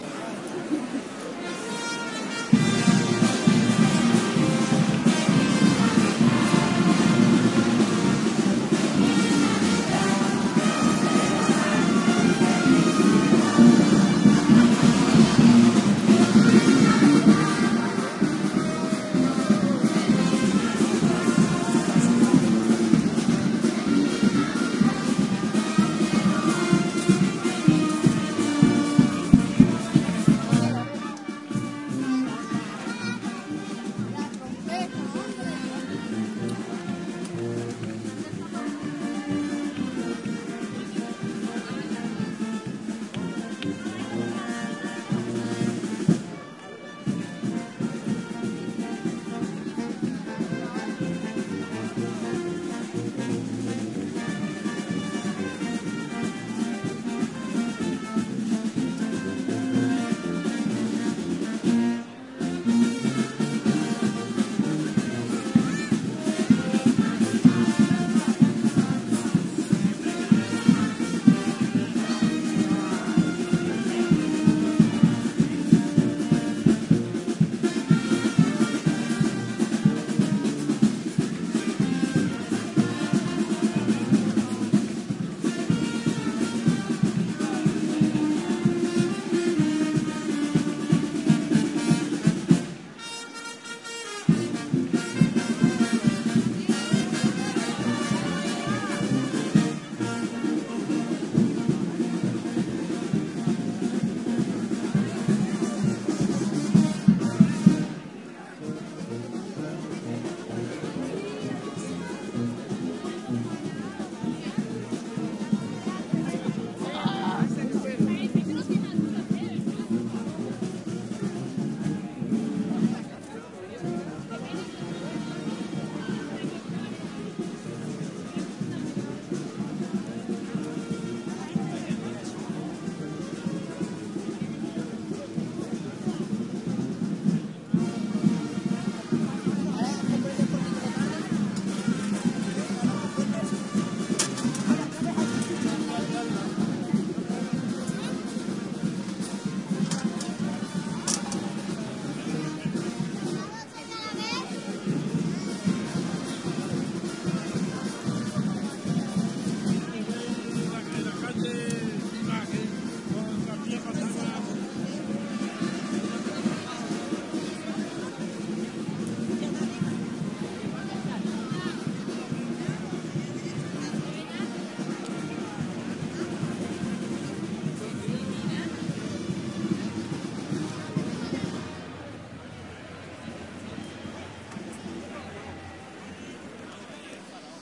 20121226 feliz.navidad.01
Crowd talking and singing around a brass band doing a street performance. Musicians play Christmas songs, people around them sing, dance, and have fun. Recorded on Avenida de la Constitucion (Seville, S Spain) using PCM-M10 recorder with internal mics, equalized in post